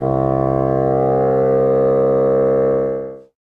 fagott classical wind
classical, fagott